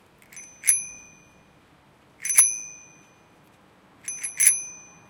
I rang a few bicycle bells near Matsudo train station. [TAKE 1]
In Matsudo, Chiba, east of Tokyo.
Late October 2016.